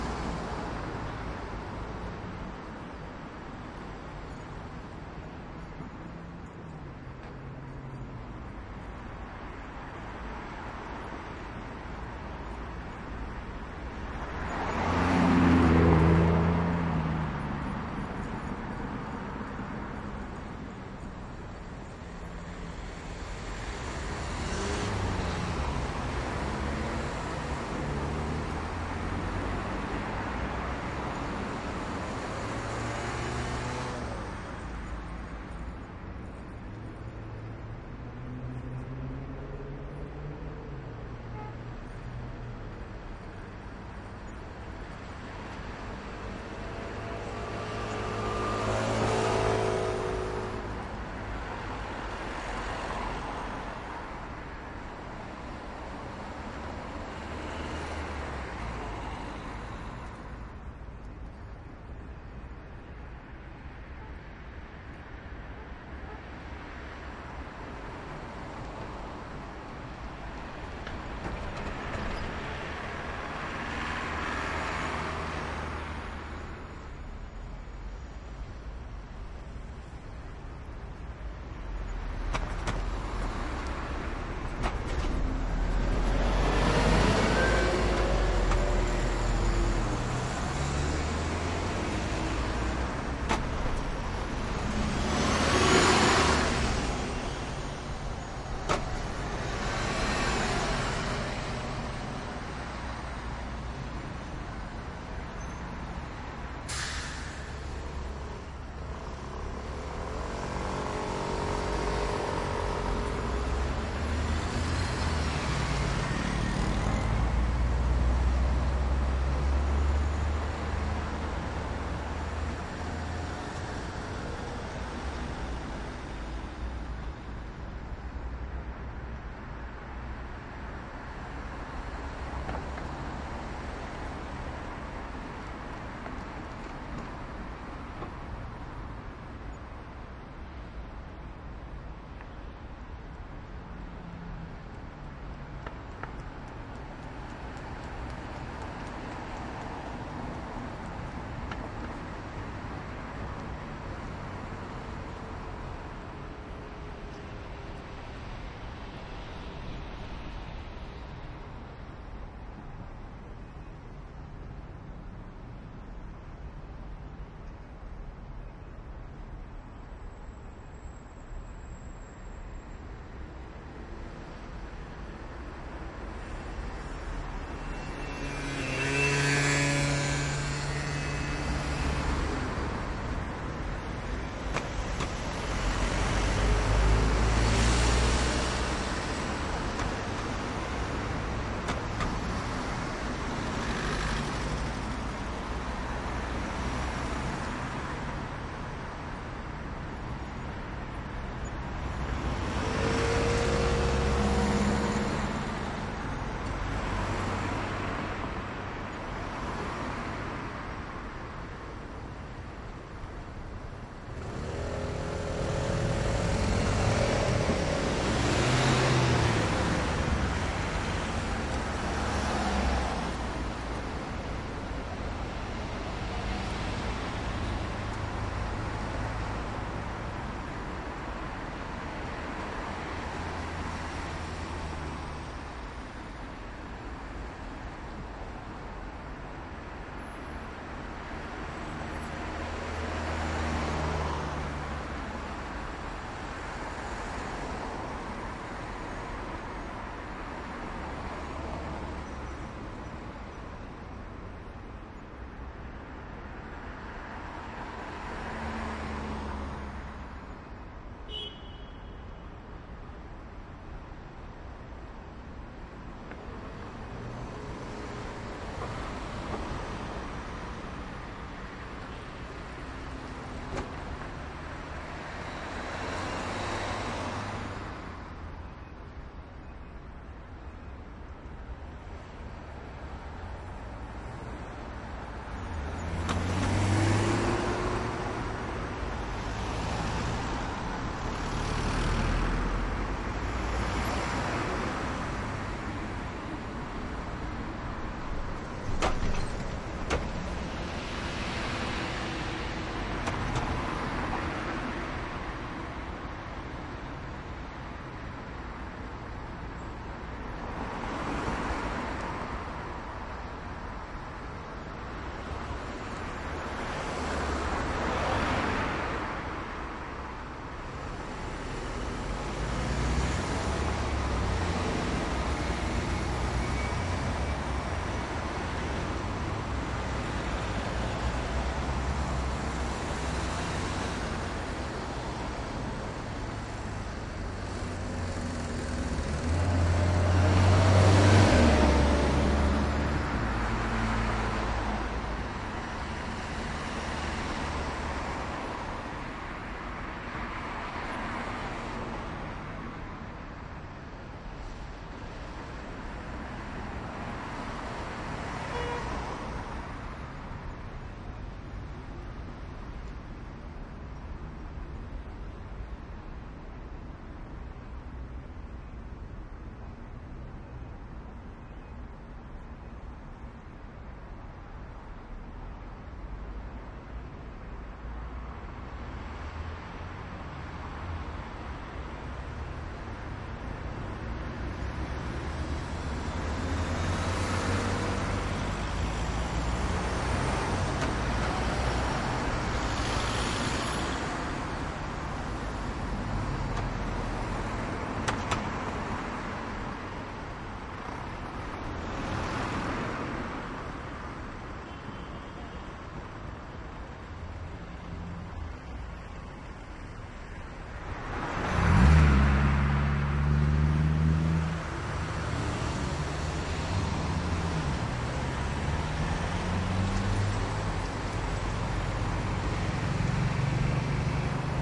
Plaza Castilla Kio R
Madrid Plaza Castilla recording, in the right Kio Tower. Medium traffic, close to the square, medium speed cars, horn, siren, motorcycle, brakes, quiet pedestrians.
Recorded with a Soundfield ST450 in a Sound Devices 744T
atmosphere, cars